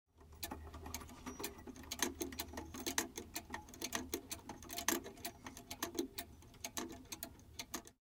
Mono track recorded with a Rode NT1. I close-miked a cuckoo clock that I was holding while letting it tick.
clock, cuckoo, chime